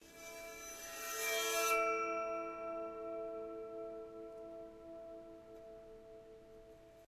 Probably the coolest sound I've ever made... created by bowing a chord on a fretless zither.